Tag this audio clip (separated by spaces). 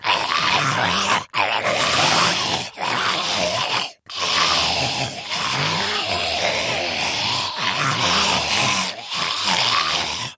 creepy herd hoard undead walking-dead zombie zombies